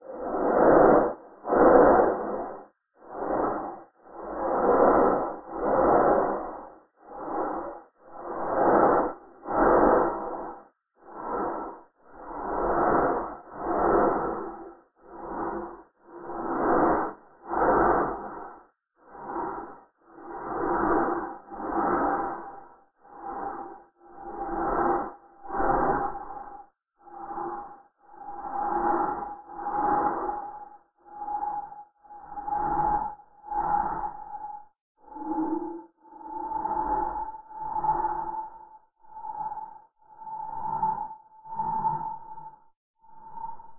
Strange breath01
Sound of strange breathing patterns. Made on a Waldorf Q rack.
breath, breathing, synthesizer, waldorf